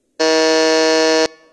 I made this sound by shortening and amplifying the doorbell buzzer on this site. It fits much better into a game show setting now.
wrong-answer, buzzer, game-show, buzz